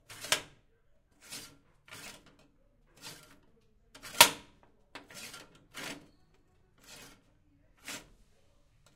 metal heavy dungeon prison door hatch open close slide squeak various int perspective on offmic +bg voices Logandan hostel kitchen
close, door, hatch, heavy, open, squeak